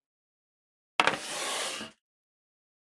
Placing tray on the table
placing, tray